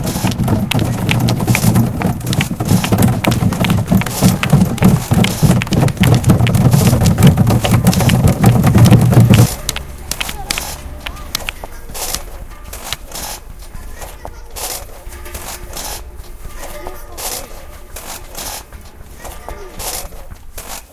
Sonic Postcard CEVL Group 3
CEVL, TCR